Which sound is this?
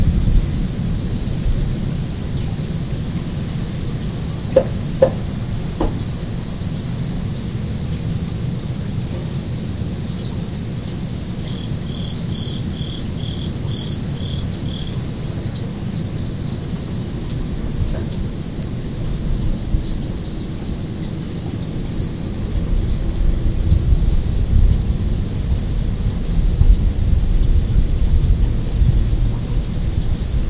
Rain, crickets & windchime during a spring storm in Kansas